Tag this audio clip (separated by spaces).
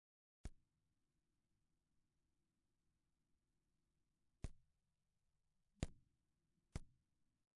Action Punching